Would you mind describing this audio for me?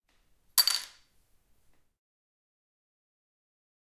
dropping a bottle cap on a wooden table
beer, bottle, eating, Panska, wood